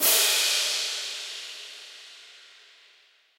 Trap Crash
Most commonly used in trap, hip hop, and other electronic music. The origin is unknown, this sample is very frequently used in lots of modern music.